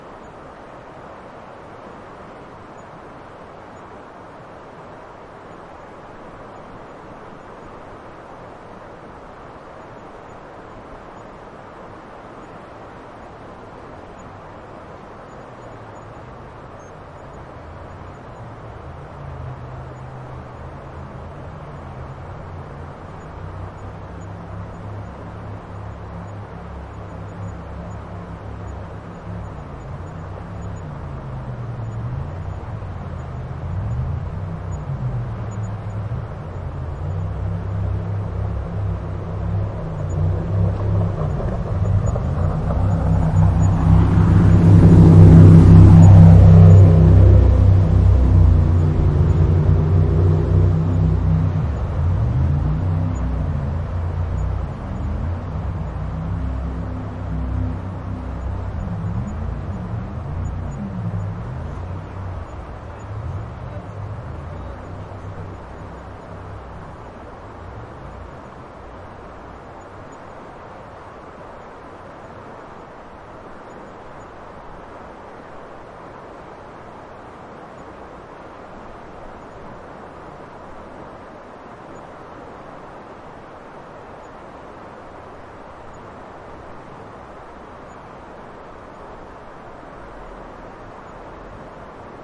NZ JetBoat RiverPassby
Shotover Jet Boat passing by on Shotover River in New Zealand.
Stereo recording. Edirol R09HR with Sound Professionals Binaural mics positioned on river pebbles on ground.
NZ, New, Zealand, Jet, V8, Shotover, Boat, Queenstown